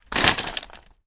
Smashing, Wooden Fence, C
Raw audio of smashing wooden fence panels. I needed to get rid of an old fence, so might as well get some use out of it. Browse the pack for more variations.
An example of how you might credit is by putting this in the description/credits:
And for similar sounds, do please check out the full library I created or my SFX store.
The sound was recorded using a "H1 Zoom V2 recorder" on 21st July 2016.